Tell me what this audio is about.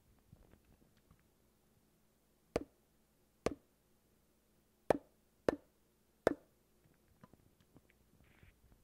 Hitting a plastic bottle